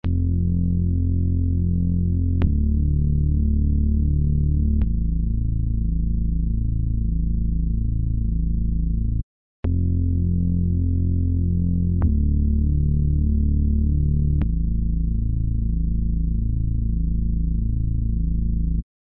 FDA2 Bass 100
Deep bass synth sound as part of a song set